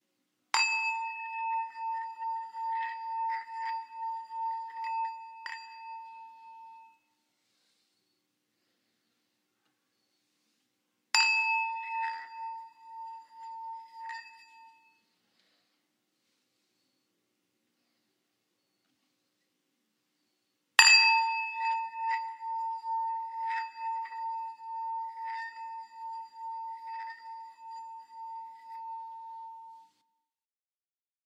Sound of a tibetan singing bowl for meditation